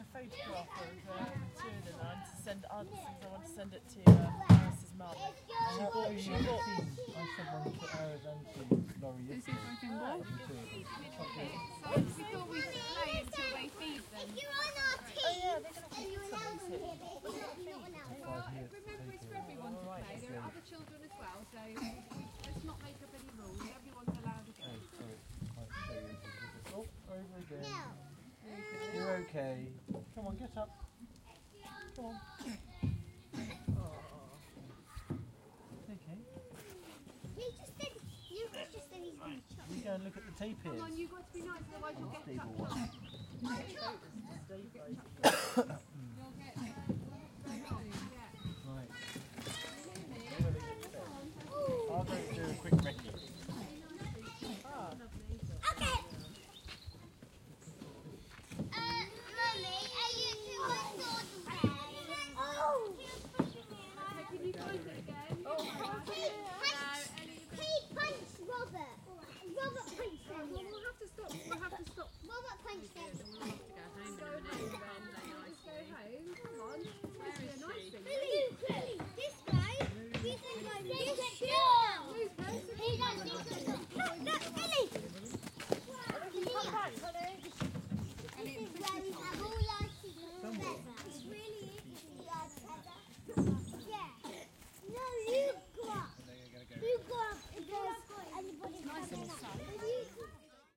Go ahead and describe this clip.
Atmos from a playground. Children playing and parents talking.
Equipment used: Zoom H4 recorder internal mics
Location: Linton, UK
Date: 25/10/15